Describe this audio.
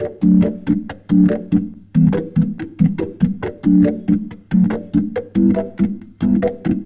Zulu 70 D BUBBLE 01
Reggae rasta Roots